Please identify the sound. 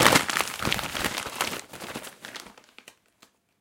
ppk-crunch-14
Short sound of paper being handled. Part of a percussive kit with paper-sounds.
paper, percussive